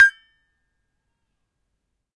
Sample pack of an Indonesian toy gamelan metallophone recorded with Zoom H1.
hit, metal, metallic, metallophone, percussion, percussive